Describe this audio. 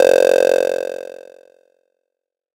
Blip Random: C2 note, random short blip sounds from Synplant. Sampled into Ableton as atonal as possible with a bit of effects, compression using PSP Compressor2 and PSP Warmer. Random seeds in Synplant, and very little other effects used. Crazy sounds is what I do.
110, acid, blip, bounce, bpm, club, dance, dark, effect, electro, electronic, glitch, glitch-hop, hardcore, house, lead, noise, porn-core, processed, random, rave, resonance, sci-fi, sound, synth, synthesizer, techno, trance